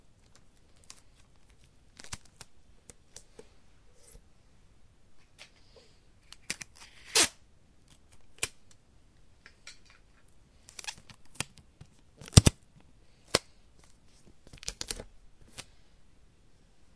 In this sound I am unrolling and tearing a piece of Scotch tape. Recorded with a zoomH2.